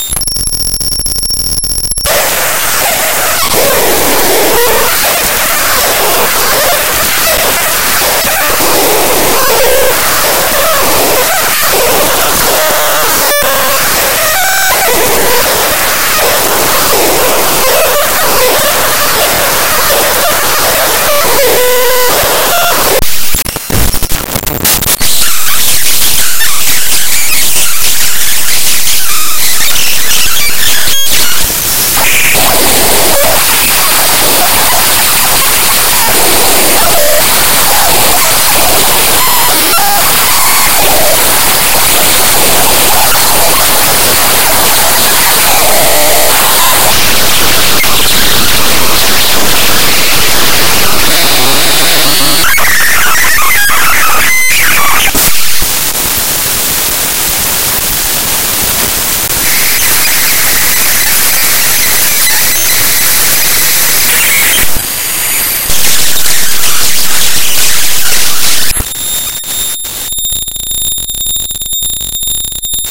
Raw import of a non-audio binary file made with Audacity in Ubuntu Studio